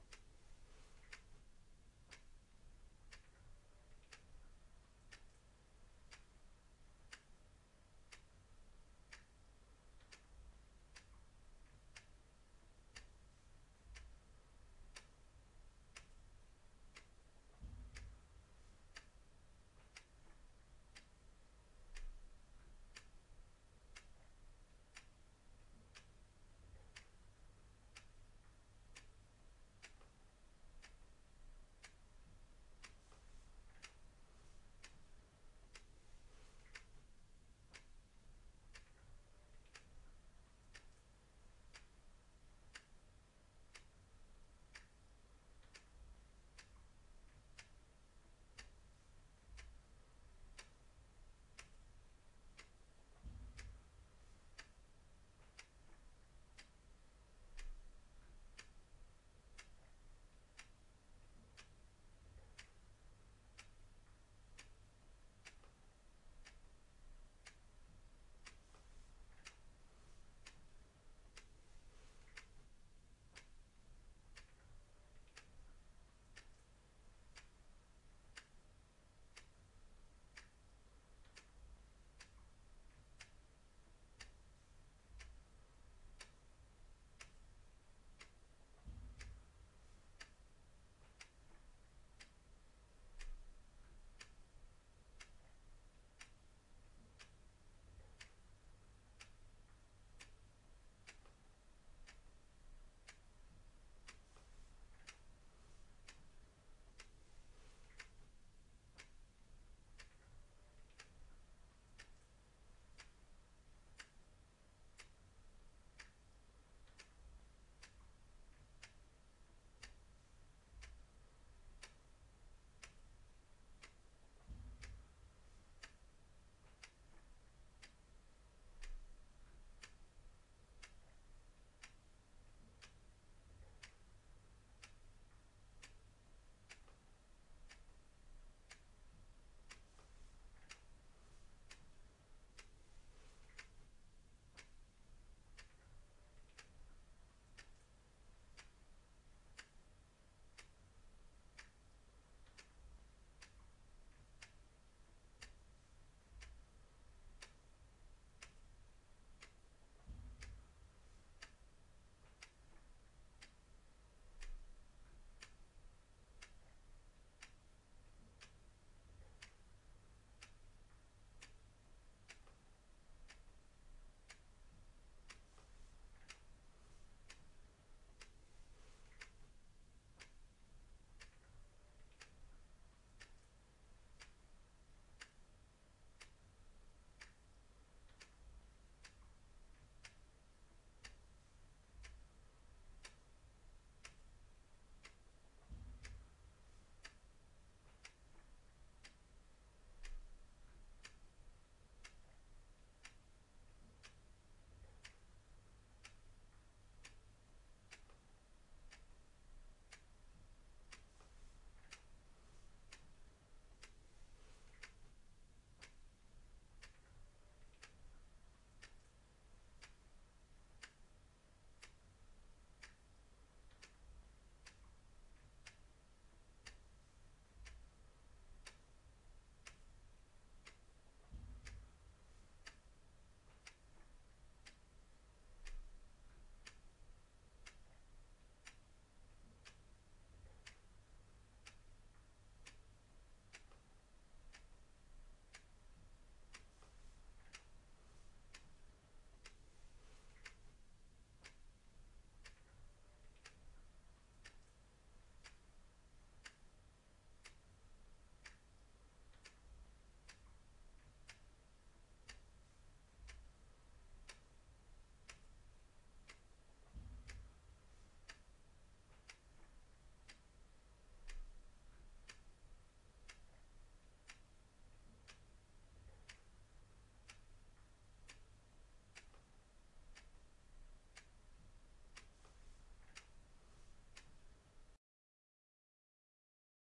Chess clock 2(mechanical)
Mechanical chess clock ticking.
chess, clock, mechanical, ticking